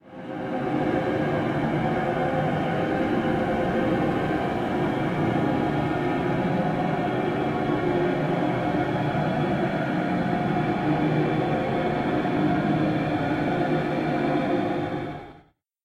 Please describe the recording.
Scary Sci Fi Mystery Noise 01
cool, effect, fiction, futuristic, game, mystery, noise, old, original, scary, school, sci-fi, scifi, sound, unknown